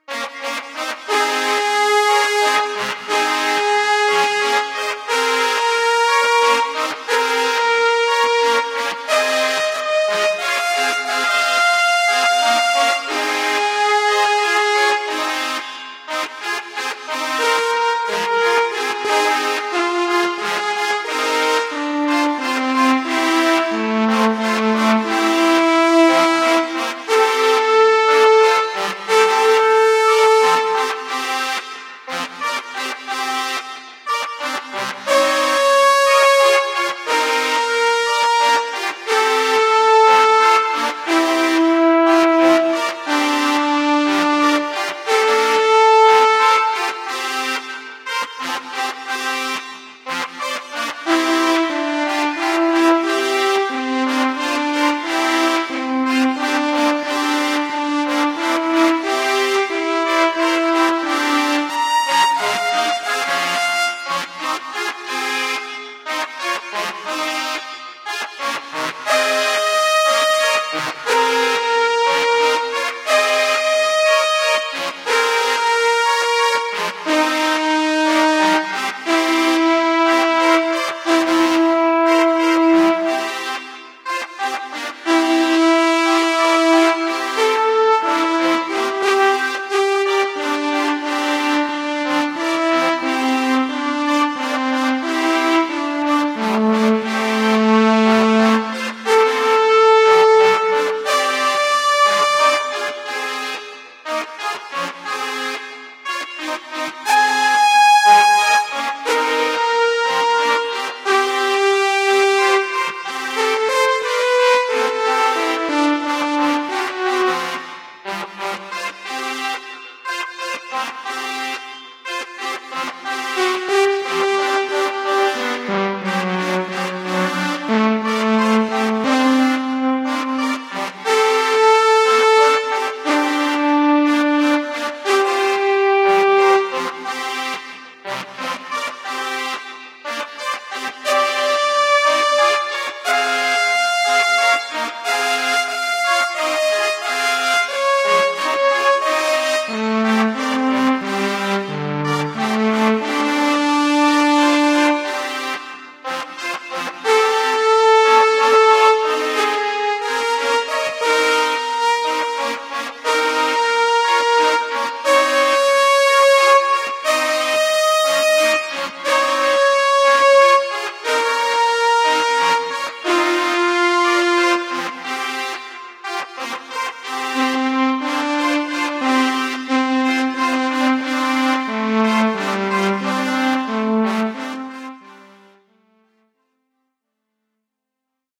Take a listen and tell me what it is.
Two pairs of trumpets randomly burst out pentatonic movements in a fixed rhythm while a solo trumpet swells above them, with the pentatonic tonal center shifting occasionally. This is totally synthetic, output from an AnalogBox 2.41 circuit that I built as a way of toying with some ideas for how to generate a decent-sounding trumpet. There are no samples involved in the synthesis, as it is accomplished by low-level oscillators, delays, filters, and such things as that. One of the interesting aspects of this experiment is that I got much better results (as in this circuit) by letting what I call the "attack burble" (the brief modulations of the waveform at the onset) be controlled by a resonant delay. The accompanying trumpets and the solo trumpet use somewhat different circuits, and they are not interchangeable, surprisingly.
abox, brass, fanfare, music, synthetic, trumpets